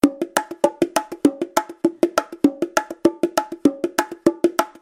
a loop of bongoes playing a cumbia beat at 99 bpm. Some leakage. Unprocessed. Mono file. Recorded in a large living room at 16 bits with AKG C214.